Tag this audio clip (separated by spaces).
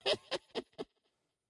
bag laughing crazy laugh witch